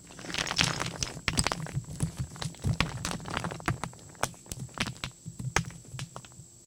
Dumping a wheelbarrow full of rocks on the ground.
gravel, rocks, wheelbarrow